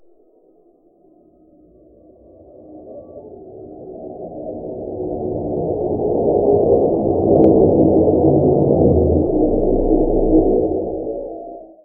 scifi starship
A sound created by processing random noises I recorded with a microphone. For me it sounds like a flying-by spaceship.
alien, ambient, engine, flyby, sci-fi, spaceship, starship